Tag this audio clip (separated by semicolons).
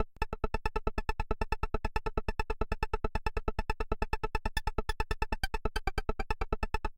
psytrance; sci-fi; sound-design; sfx; Psy; synth; effect; psychedelic; fx; digital; synthesis; noise